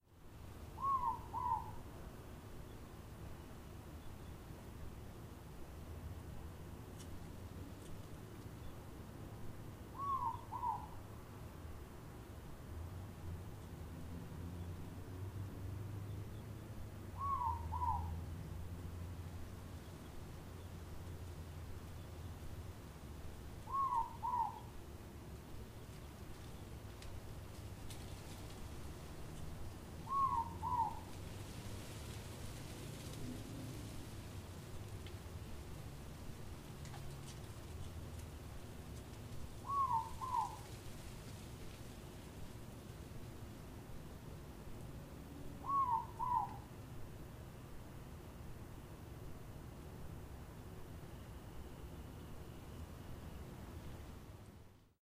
Morepork / Ruru (Owl) - Auckland, New Zealand
This was recorded in front of my house in the suburb of One Tree Hill in Auckland, New Zealand. It was recorded at around 10pm on a clear evening in August 2015 with a Zoom H4n.
You can hear a morepork. You can also hear the leaves of a big oak tree rustling in the wind.
A morepork is a kind of owl found in New Zealand and Tasmania.
Morepork, nature, night